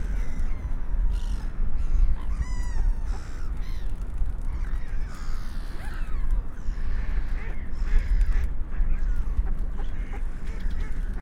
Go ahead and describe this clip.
gulls near river
gulls near city river
river, city, gulls, water